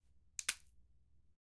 HOR Gore Celeri 03
Snapping celery in my basement:)
break, snapping, gore, celery